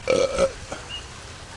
zoo burp bird
Walking through the Miami Metro Zoo with Olympus DS-40 and Sony ECMDS70P. Birds and a nice burp.
animals, field-recording, zoo